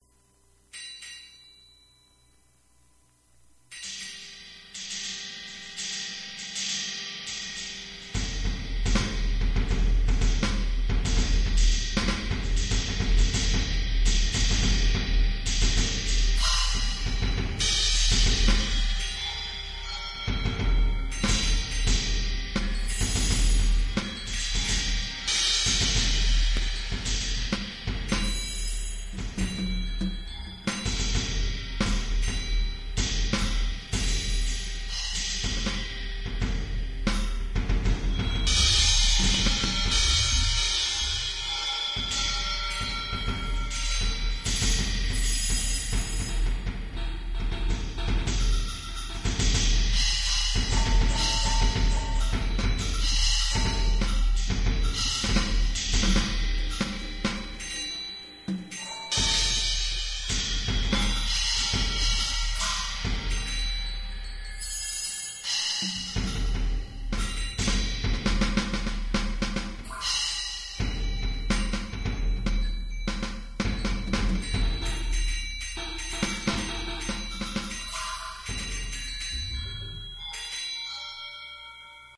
warmup Mixdown
no real pattern just warmup a little on percussion style setup
drums, perc, percussive, groovy, percussion